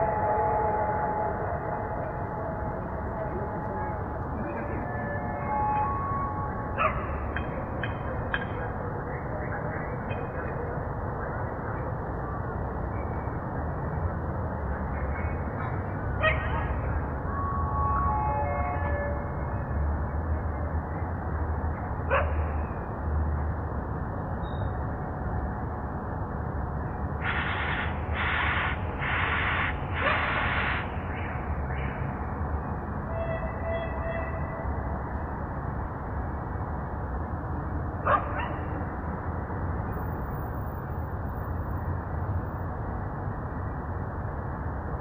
45 seconds near railway station
45 seconds of sound, recorded near the Vladimir central station (Russia).
central, rail, station, railway, railway-station, city, trains